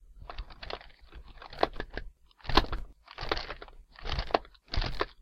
Some paper sounds.
paper, page, pages, turn, book, newspaper, turning, magazine, books, reading, read, flip, flick